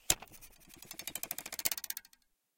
Queneau Douing 05
battement de regle sur le bord d'une table
bizarre, douing, metal, psychedelic, vibrate